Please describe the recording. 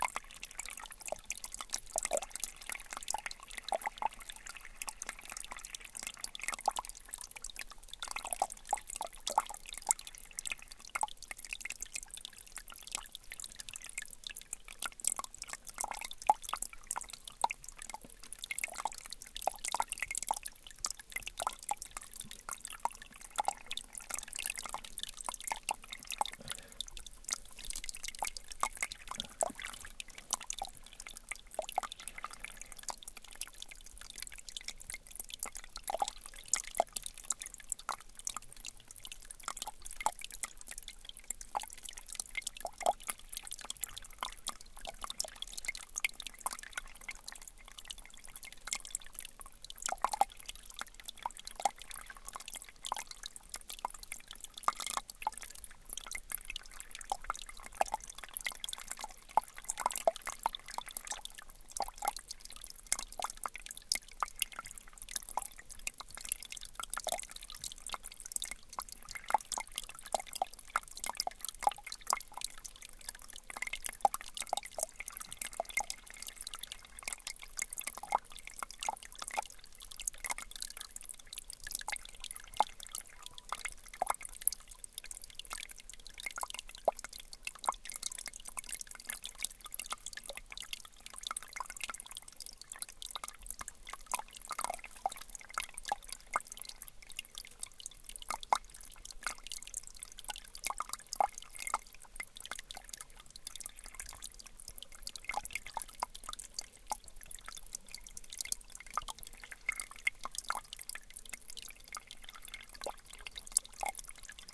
WATER IN STREAM
Water trickling down in a stream. Mukhteshwar in the Northern hills in India.
Recorded on H4N, XY STEREO
Field-recording, nature-sounds, water